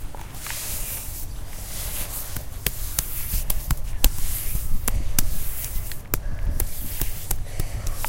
Field recordings from Sint-Laurens school in Sint-Kruis-Winkel (Belgium) and its surroundings, made by the students of 3th and 4th grade.